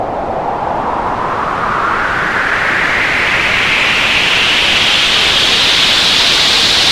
A bunch of various filtersweeps I created in Adobe Audition by generating whitenoise and using the filtersweep plugin. Useful for creating build-ups.
build-up, filter, filtersweep, noise, sweep, white, whitenoise